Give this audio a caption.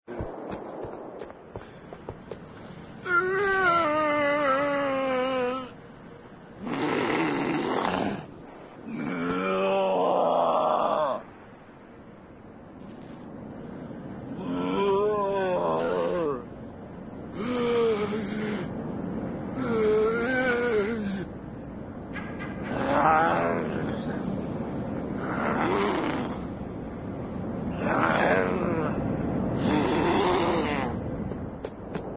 A Moaning Zombie
a zombie moan
dead, groan, moan, undead, zombie